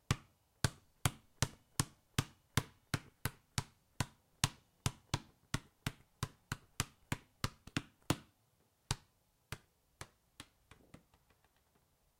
Out on the patio recording with a laptop and USB microphone. Same underinflated basketball bouncing under human power.
atmosphere, basketball, field-recording, outdoor, patio